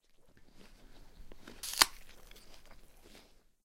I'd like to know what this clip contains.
A quick and determined bite into an apple (large attack). Recorded in a hifi sound studio at Stanford U with a Sony PCM D-50 very close to the source, a yellow/green golden delicious.